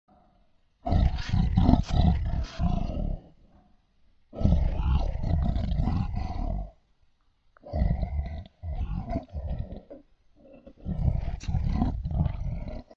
Creature rest 14/14
Creature, Dragon, Fanatsy, Animal
Animal, Creature, Dragon, Fanatsy